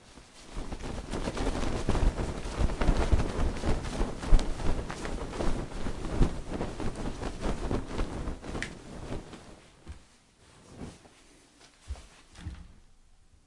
Fast Blanket Shaking
bed, blanket, fabric, fluff, fluffing, pillow, shake, shaking